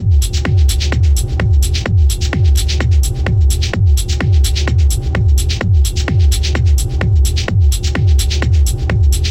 Dark Techno Sound Design 04
Dark Techno Sound Design
Dark, Sound, Techno